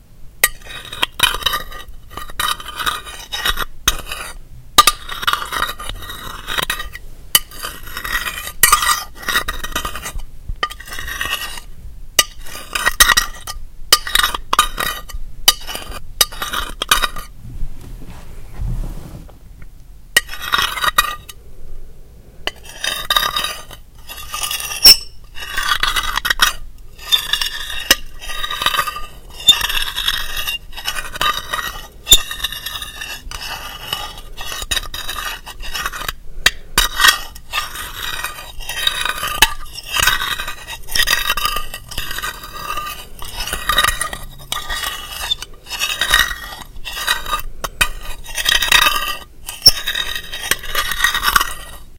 knife on plate
A sort of metal-on-concrete grinding/scraping sound, made by running a knife over a ceramic plate.
grinding, knife, plate, scraping, screeching